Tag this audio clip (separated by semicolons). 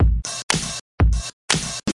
acid; beats; club; dance; drop; drumloops; dub-step; electro; electronic; glitch-hop; house; loop; minimal; rave; techno; trance